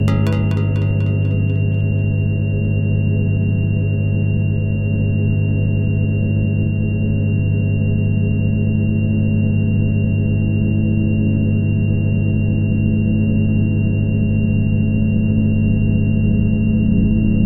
surreal suspended enviroment